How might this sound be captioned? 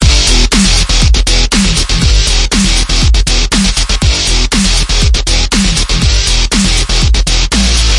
Stuttering Guitar Metal
Loop was created by me with sequenced instruments within Logic Pro X as well as these two drum sounds:
loop, loops, 120bpm, beat, drum, distorted, guitar, drums, heavy, stutter, metal